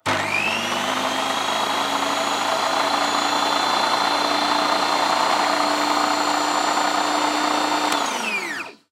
Something with a motor